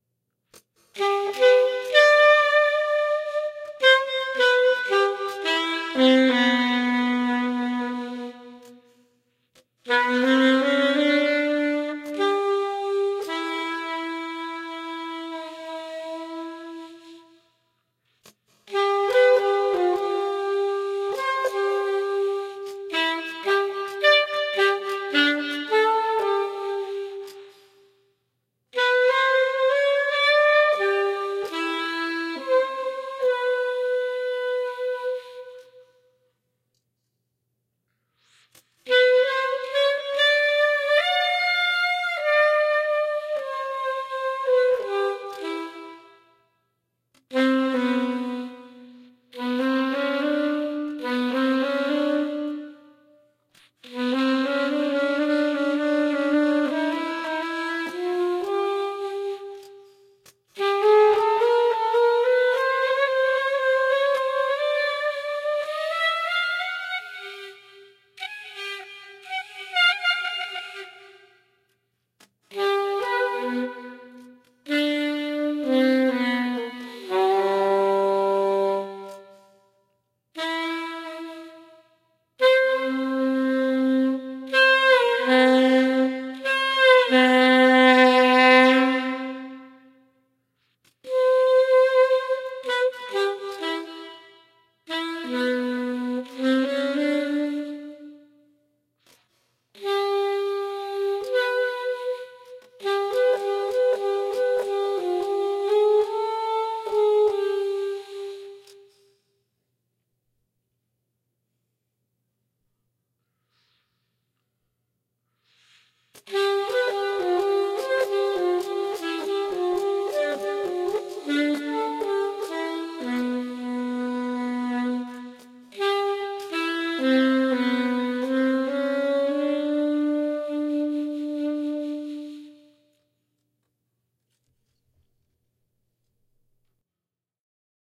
C Maj. sax
alto sax solo in C Major, recorded with shure sm57...23ms double track and reverb added...
maj, alto, c, major, sax